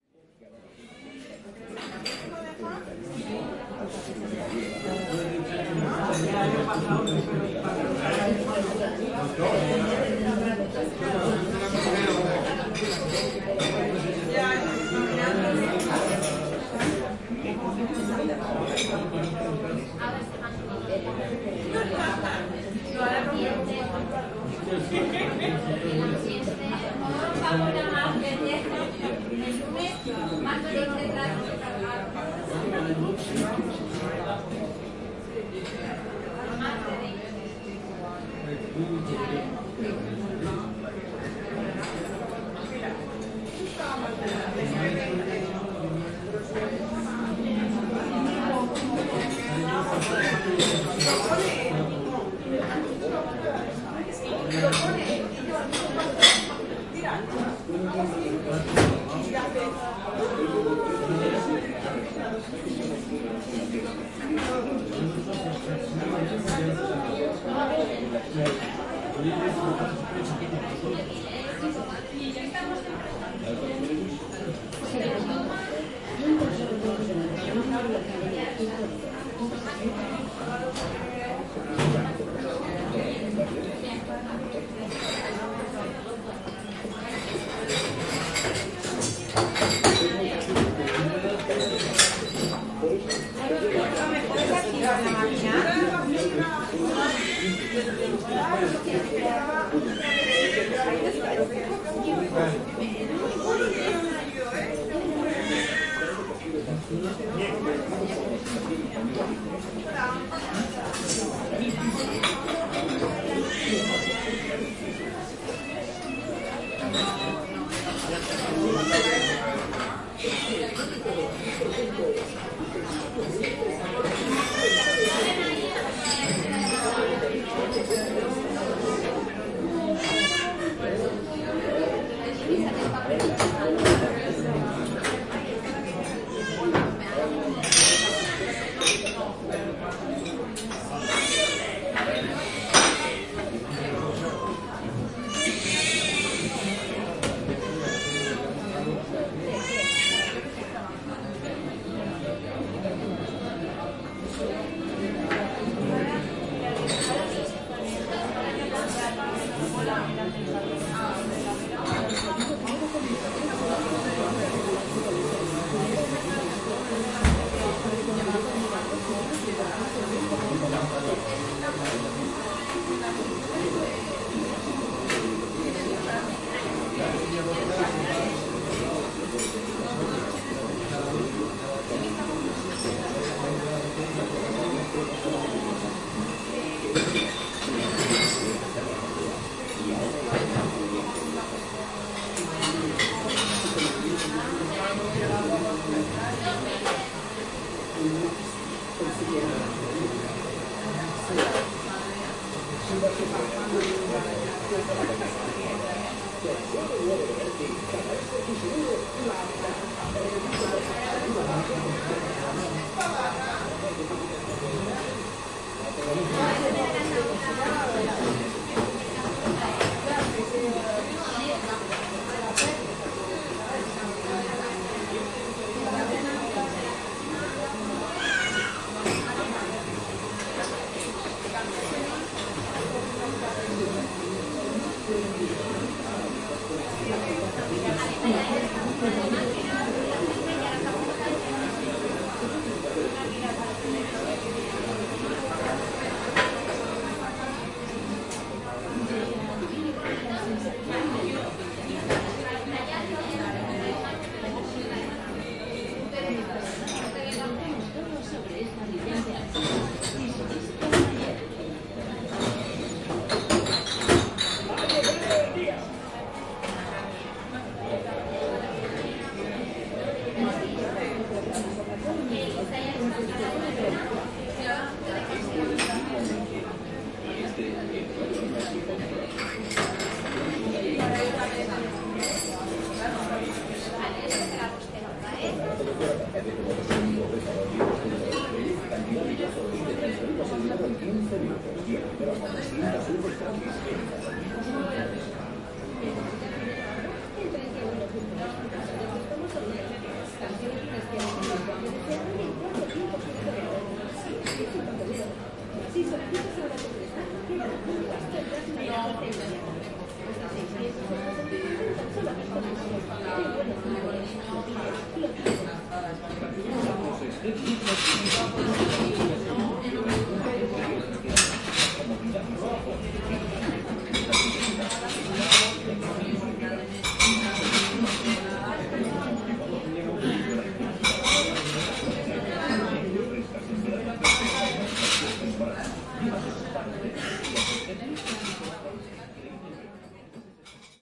Coffee shop
This sound was recorded inside a fast food restaurant. The environment includes the sounds of people talking, coins noise, cups, plates and coffeemaker noise
ambience, coffee, coins, cups, footsteps, noise, people, plates, shop, voices